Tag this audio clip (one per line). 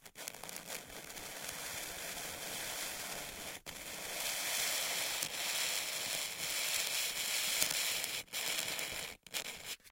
gle
r
Grattements
piezo